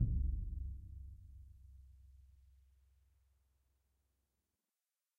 Symphonic Concert Bass Drum Vel07
Ludwig 40'' x 18'' suspended concert bass drum, recorded via overhead mics in multiple velocities.
orchestral, drum, symphonic, bass, concert